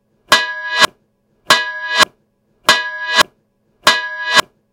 RIVIERE Anna 2017-2018- Weird sound
To produce this sound, I recorded myself taping on a metallic object. Then I reduced the ambient noise and I decided to conserve a little piece of the sound. I first worked on this piece of sound by reducing the ambient noise. Then I duplicated this sound.
For the first sound I added an effect of reverb and a fade in at the beginning. For the other I added an effect of invert. By doing this I obtained a very weird sound that I worked on modifying its speed, tempo and pitch to create a sort of resonance.
So I decided to associate both sounds and to create a rhythm. After a mix and render I copied the sound several times.
Descriptif : Continu tonique (N) et une sorte de continu complexe (X) inversé.
Masse : Il s’agit de sons cannelés puisqu'on a un mélange de continu tonique et complexe.
Grain : Le son n’est ni rauque ni complètement lisse. Il y a un effet de résonance et de tonicité qui rend le son presque éclatant.
metallic, percussive, hit, metal, resonant